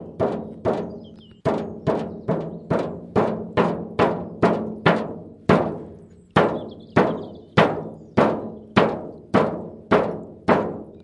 Metallic Hollow Thuds Various
Bang, Boom, Crash, Friction, Hit, Impact, Metal, Plastic, Smash, Steel, Tool, Tools